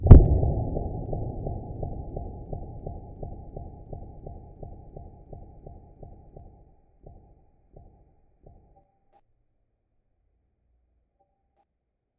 A loud boom followed by echoed thuds. a good far explosion sound effect
-Mus
echo, boom, explosion